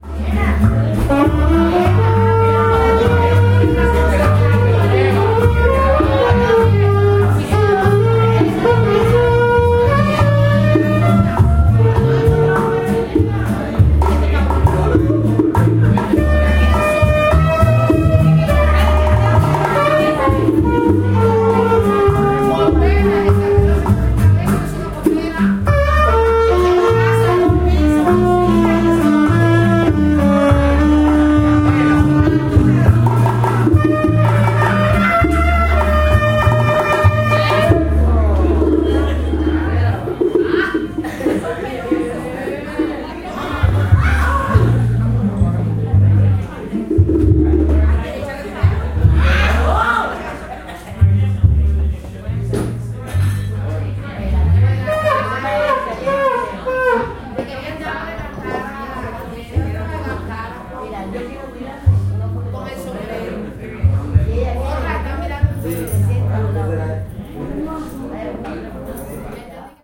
In a bar in Trinidad, Cuba
In a bar in Trinidad in the south of Cuba. A band playing, people talking. Recorded with an Olympus LS-14.